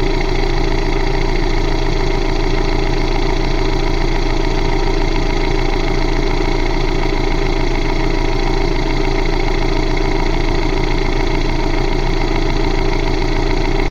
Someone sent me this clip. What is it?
Using an automotive stethoscope on top of a valve cover. The valve movement can be clearly heard.
stethoscope, Valve, sound, cover